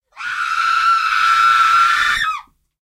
Scary woman scream recorded in Pompeu Fabra University
666moviescreams, female, horror, kill, murder, scary, screaming